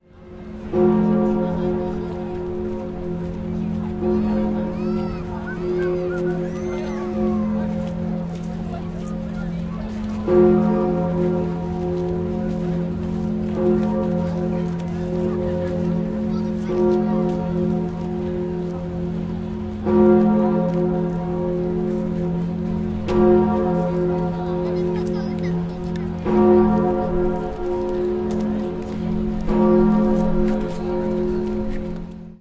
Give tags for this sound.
emmanuel; cathedral; france; notre-dame; bell; paris; church; bourdon

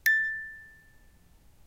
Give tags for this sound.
bell; box; music; tones

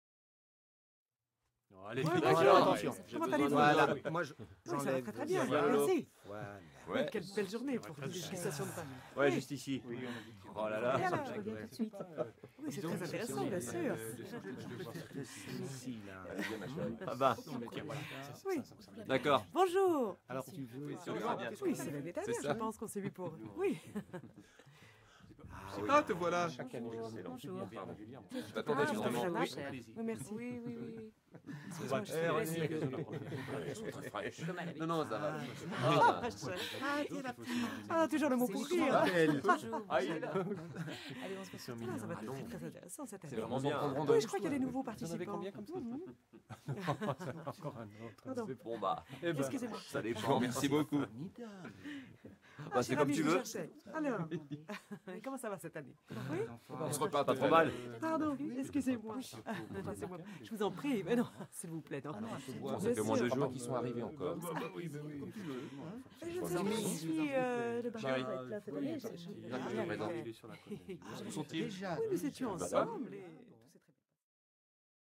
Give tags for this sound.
walla; dialogue; french; ambience

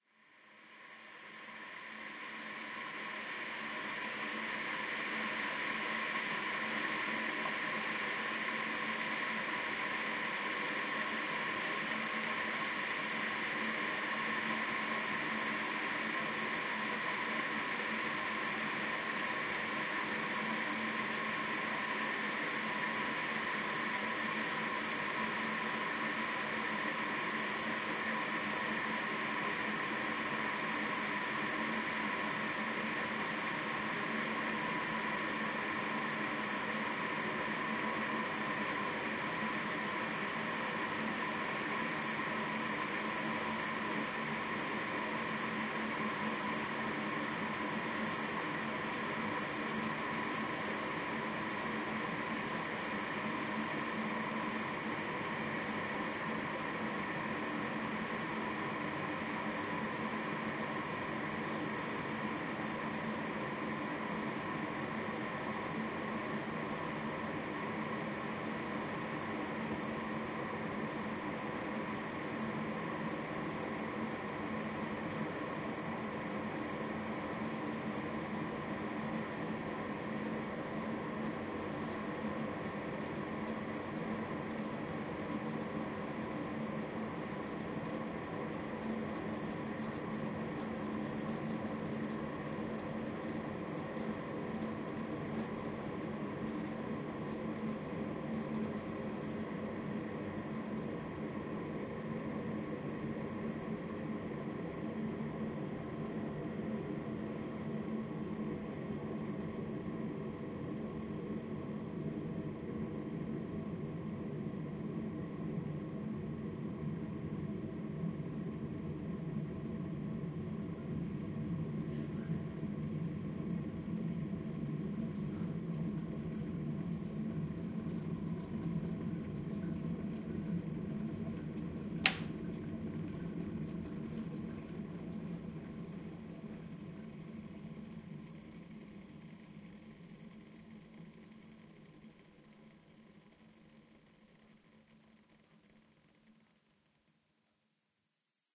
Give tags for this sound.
boil; breakfast; coffee; electric; hot; kettle; kitchen; lid; liquid; lunch; metal; mug; pouring; spoon; steam; stir; teapot

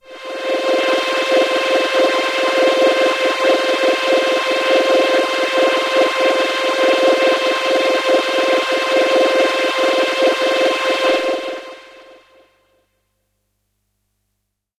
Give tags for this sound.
electronic; waldorf; synth; multi-sample; space; ambient; pad; space-pad

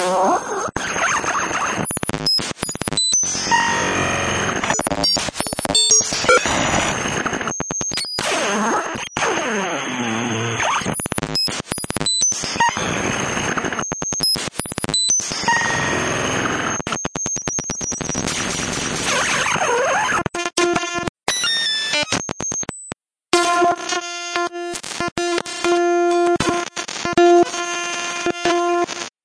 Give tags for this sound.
raw; dare-26

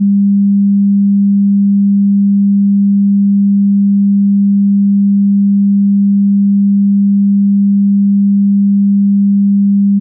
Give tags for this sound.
hearing-test; sine-wave; tone

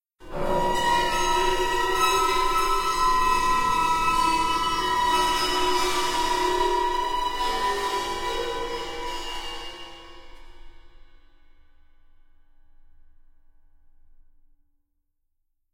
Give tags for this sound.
chaos; metal; remix; reverb; wet

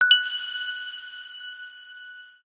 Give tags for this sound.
coin; diamond; game; item; note; object; pick-up

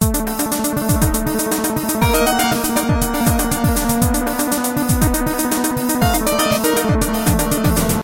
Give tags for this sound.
game; loop

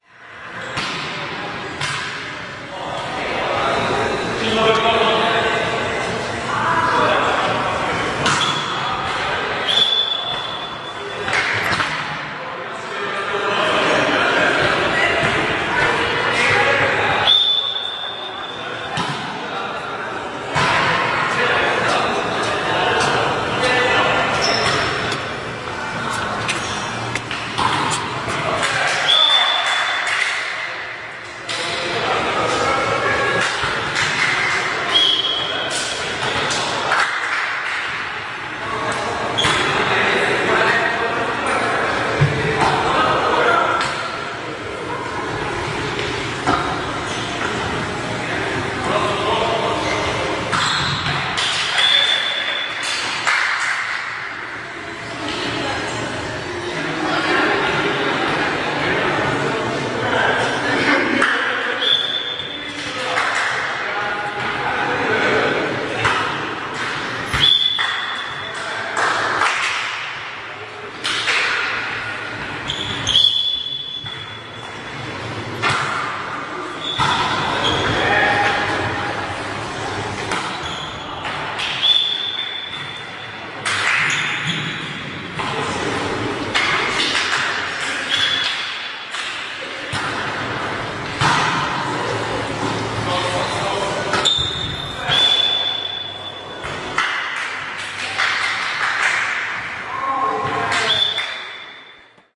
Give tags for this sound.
poznan; sport-day; volleyball; field-recording; university-of-medical-sciences; match; sports-hall

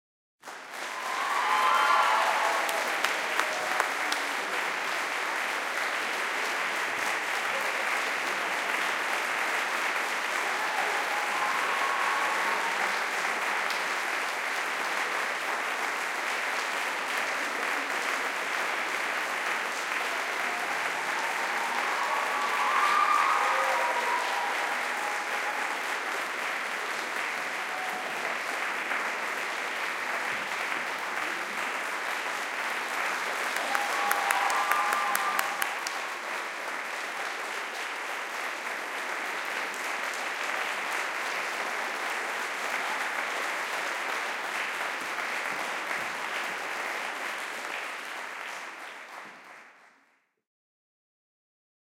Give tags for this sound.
applauding; cheering; clapping

